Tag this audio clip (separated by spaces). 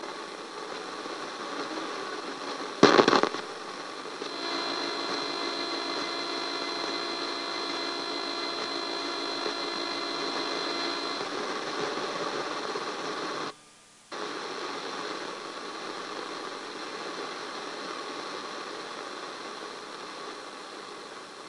medium tune human seamless noise tempo radio error electricity futz static voice looping annoyance tunning